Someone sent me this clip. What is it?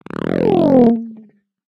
MILK JUG SEAL 01
The sound created by rubbing one's fingernail along the corrugated sealing strip which is found on a typical milk jug. Recorded with an Audio Technica ATM250 through a Millennia Media Origin preamp.
twang, tag, seal, jug, guiro, milk